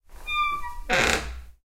door creak short version
recorded with a EDIROL R-09HR
original sound, not arranged